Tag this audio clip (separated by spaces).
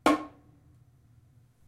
bongo drum kit